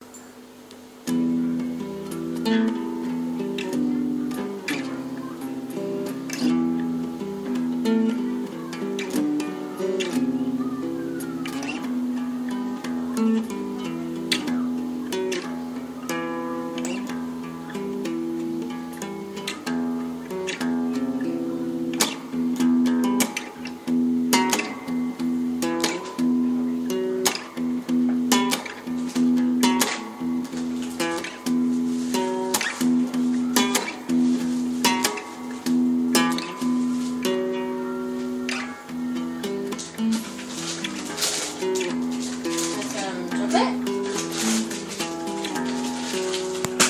Dark Stringz
Uneek guitar experiments created by Andrew Thackray